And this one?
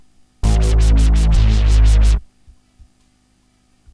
BassSynthRight 1 in A
Bass Sample Mono (Right of the Stereo), created with Triton LE 2 Step Bass with LFO.
Bass, Dubstep, LFO